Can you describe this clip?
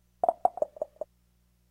small pings
Cell notification I made using a Korg Electribe ESX
cell, clicks, minimal, mobile, notification, phone, push-button